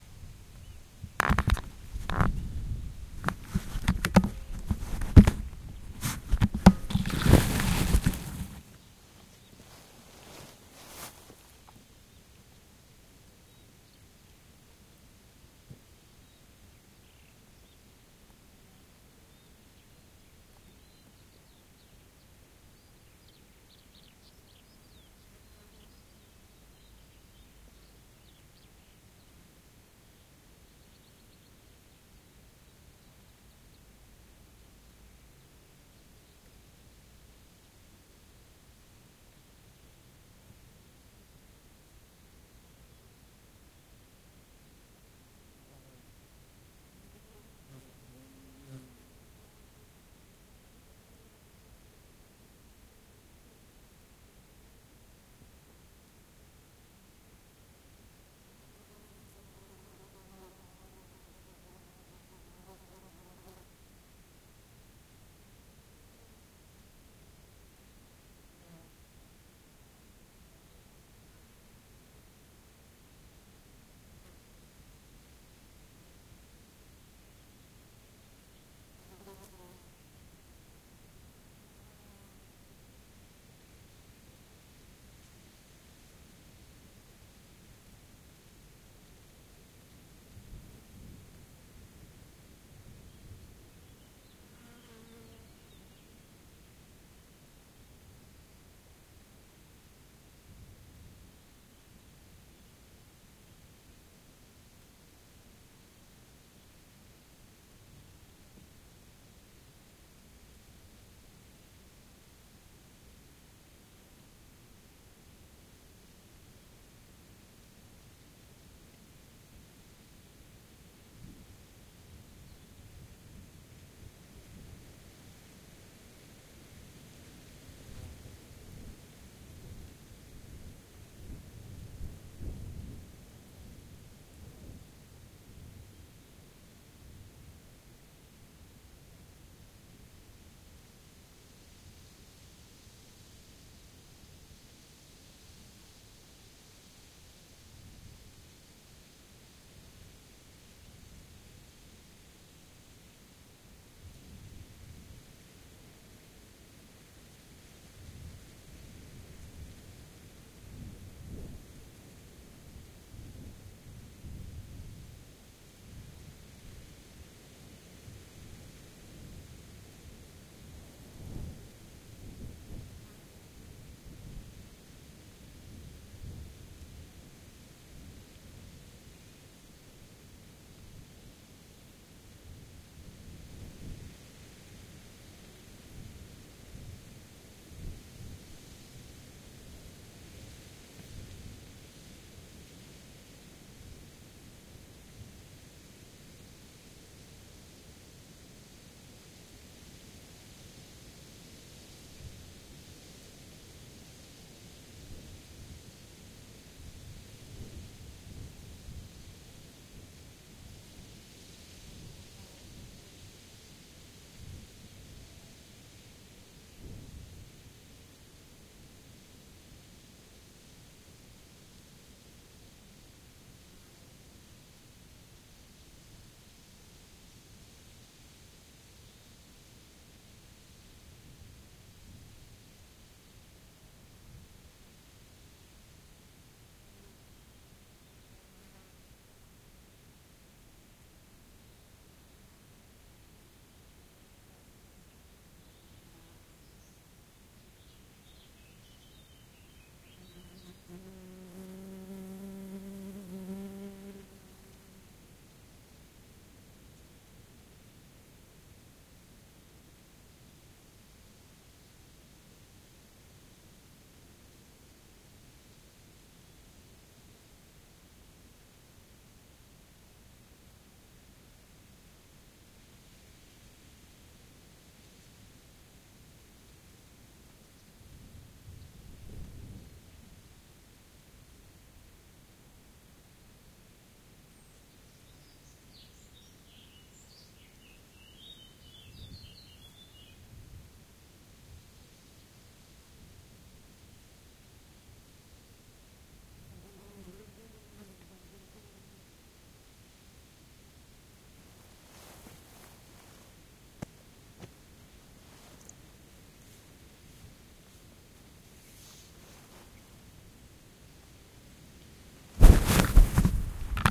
meadow in the middle of the forest - rear
meadow in the middle of the forest
wind, spring, bird, ambience, ambient, middle, birdsong, nature, birds, forest, meadow, field-recording